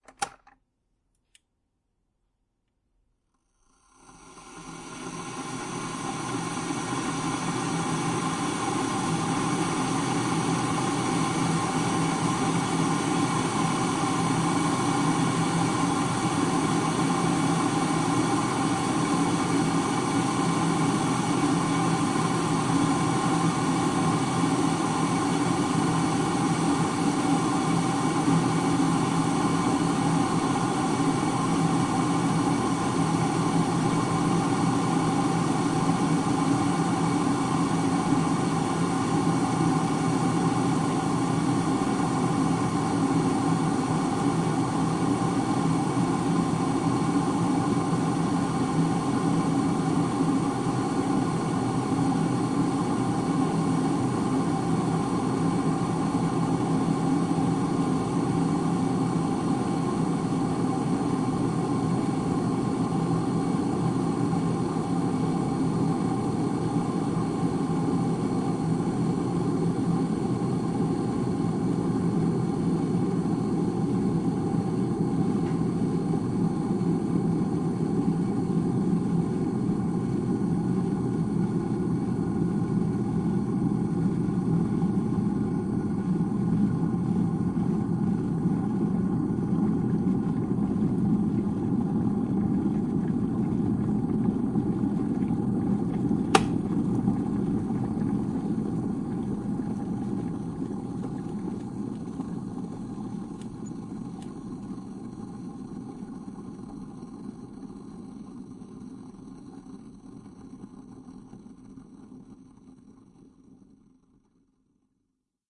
Kettle Boil
An electric kettle is switched on, the water comes to a boil and the kettle turns itself off and the water settles down.
steam, bubbles, zoom, liquid, water, tea, hot, coffee, kitchen, boiling, boil, boiler, cooking, appliances, h4n, kettle